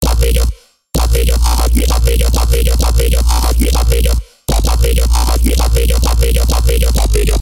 becop bass 7
Part of my becope track, small parts, unused parts, edited and unedited parts.
A bassline made in fl studio and serum.
a radio filtered talking bassline with a water like oscillating sound.
techno, Xin, low, bass, electro, Djzin, wobble, grind, electronic, loops, synth, sub, dubstep, loop, fl-Studio